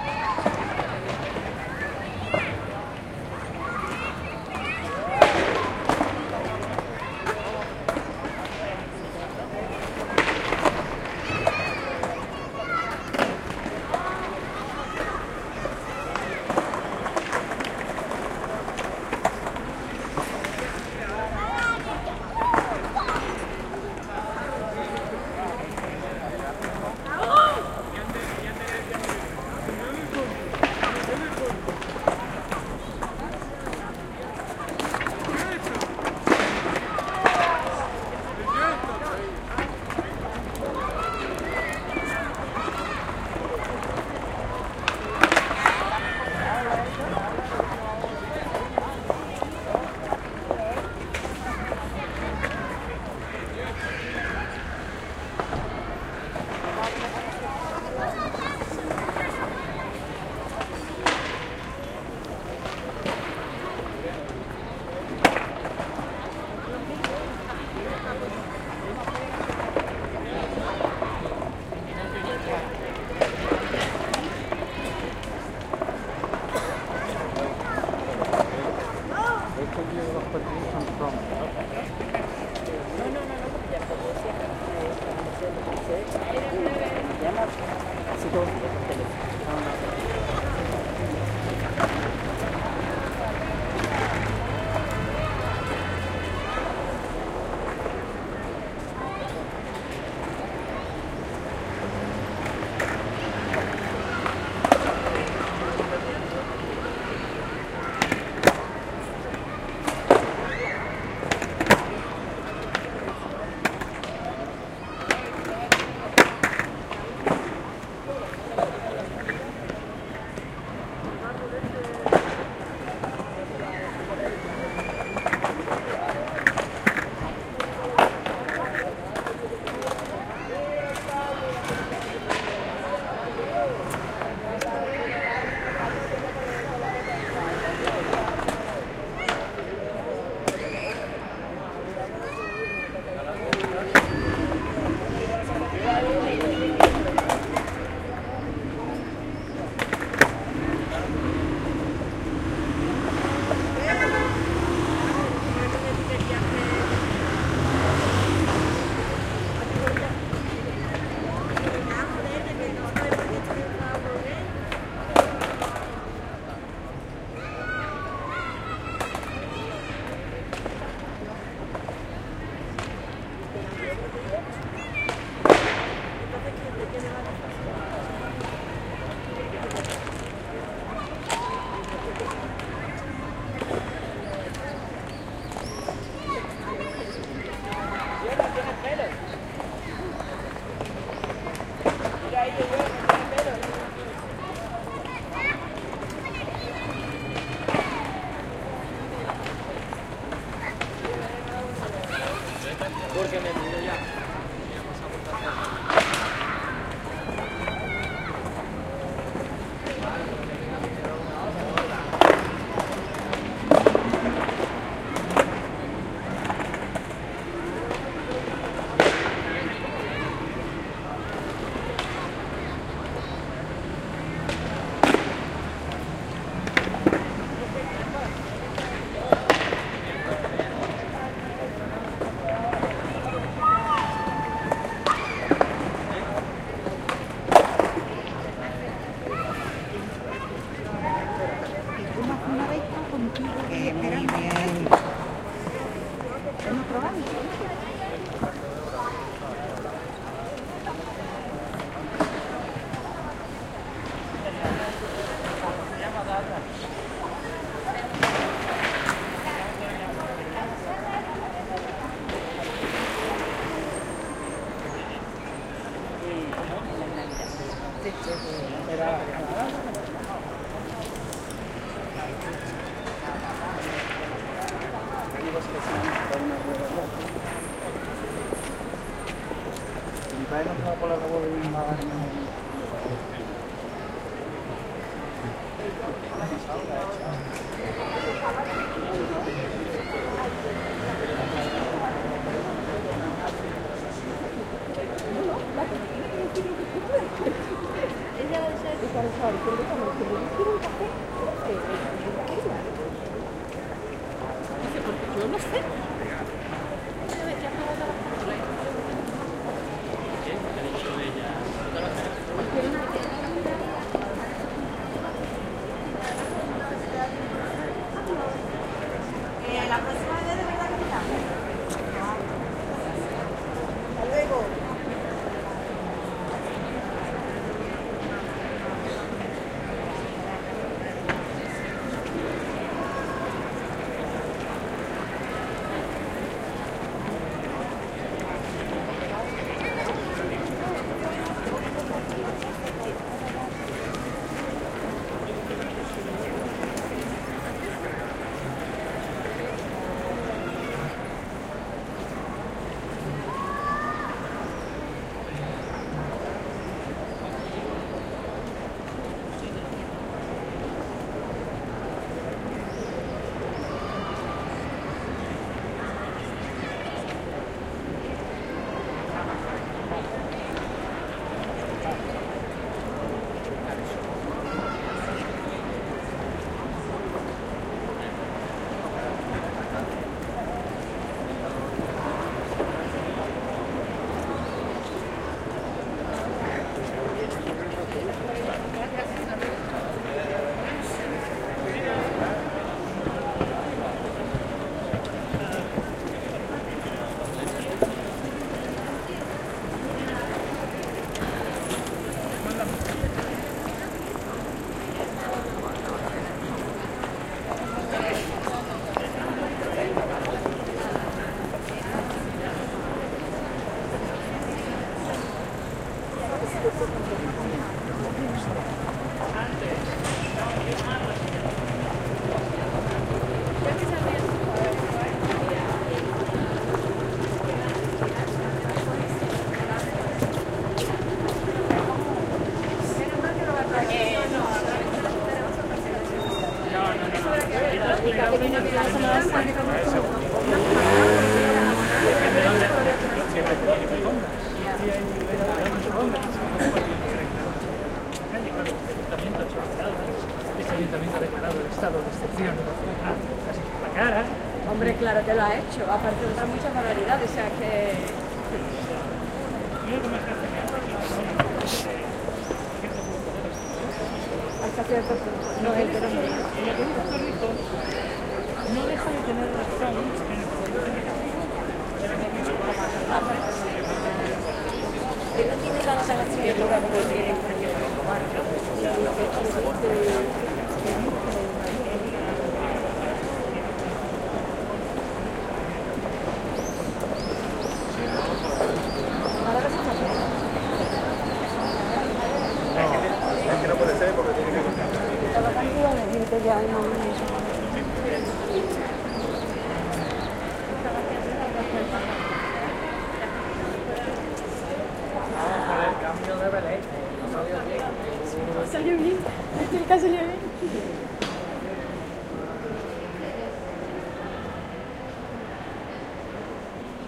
20100322.city.evening
longish take of relaxed ambiance in a pedestrian area in downtown Seville. It's spring time, kids play, skaters jump all around, adults talk, swifts screech... Very few noises from vehicles. Recorded in Plaza Nueva using a pair of Shure WL183 into Fel preamp, Edirol R09 recorder
ambiance, field-recording, spring, city